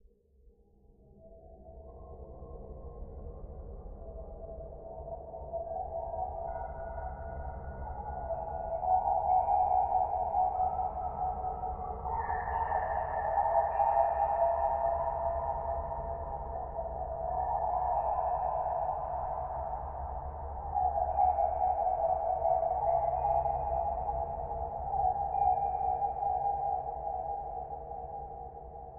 Space Traveler 2
Heavily processed VST synth sounds using various filters and reverbs.